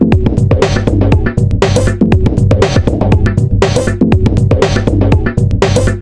made with the reaktor lime lite ensemble.